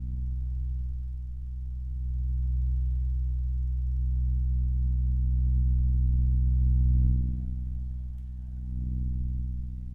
FR ventilador 01
Ventilador de mesa grabado de cerca.ç
A closeup of a fan motor.
Recorded with Tascam Im2x
Electric, Engine, Fan, Motor, Ventilador